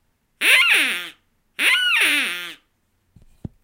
Two frantic quacks. Produced by Terry Ewell with the "Wacky QuackersTM" given for "Ride the DucksTM."